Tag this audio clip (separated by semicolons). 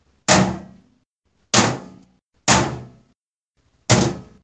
footstep; shoes; walk; metal; step; floor; foot